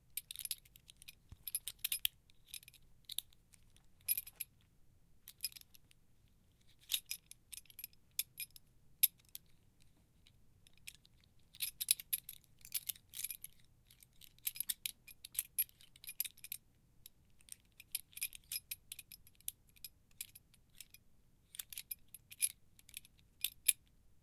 Belt Buckle
Stereo Recording of a Belt
Belt-buckle, buckle, Undress